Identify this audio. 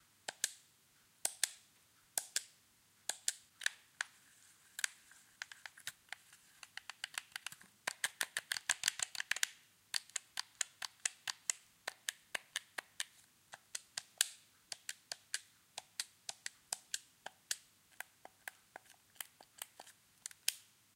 click buttons
button, click, hi-tech, press, short